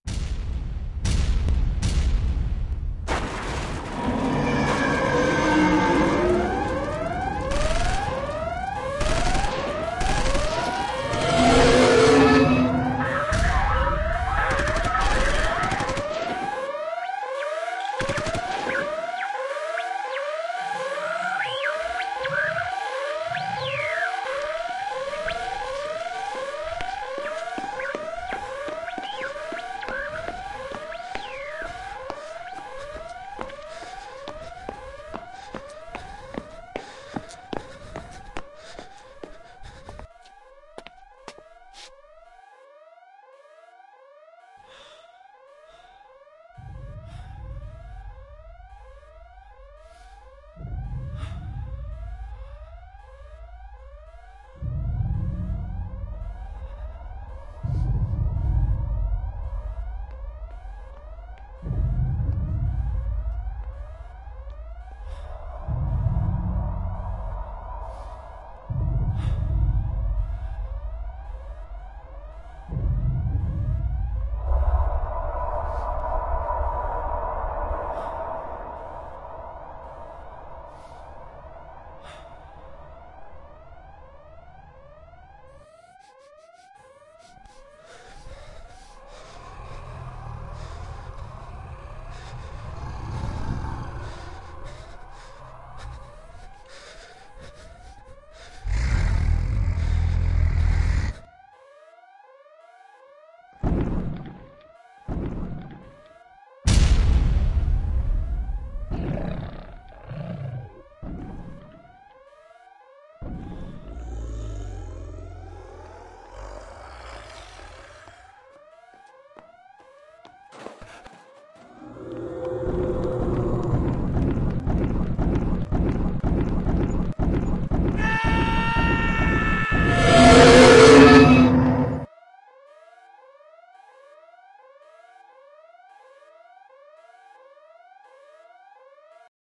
Monster Attack
It was created for a class project.
Sounds used:
attack, Monster, scary, sound, story